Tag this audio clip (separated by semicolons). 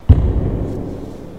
bass-drum; drum; hit